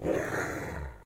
Family dog growling as we play tug of war with her favorite toy. She has a very sinister, guttural growl that is betrayed by her playful intentions. In the background, you can hear the metal leash rattling on her neck.